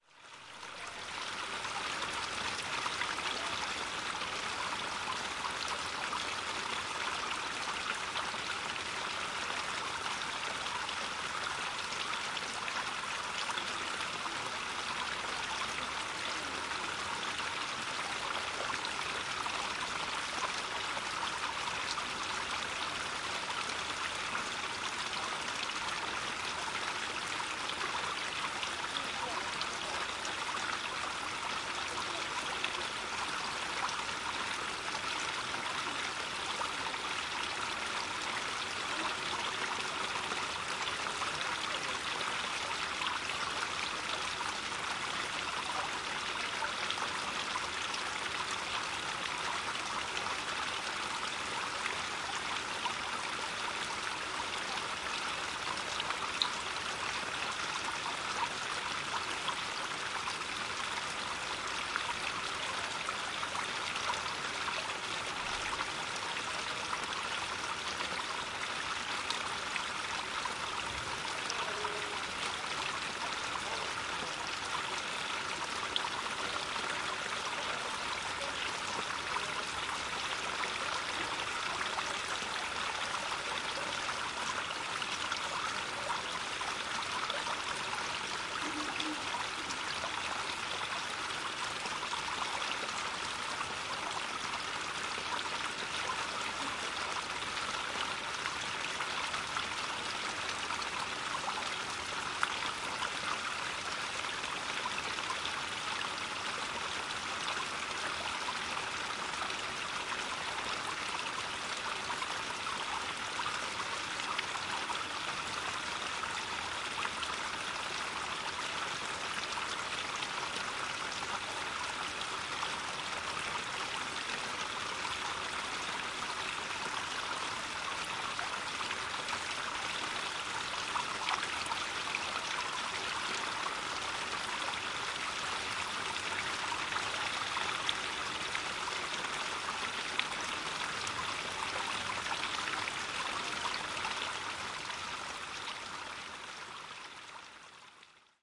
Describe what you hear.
importanne hotel fountain dubrovnik 050516
05.05.2016: recorded at around 18.00 p.m. in Dubrovnik/Lapad district (Croatia). The fountain in front of Importanne Hotel on Cardinala Sepinca street.
water, fieldrecording, fountain, splashing, bubbling, Dubrovnik, Croatia